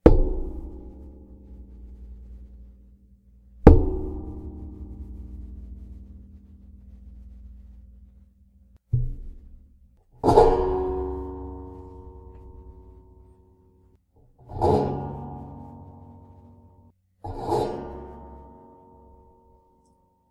Metal Impact and Scraping Spring
Metal impact sound with a vibrating scrape that resonates for a while. Made by hitting my microphones boom and scraping it's springs with the microphone still on it.
Equipment used: Audio-Technica ATR2100-USB
Software used: Audacity 2.0.5
vibration, scraping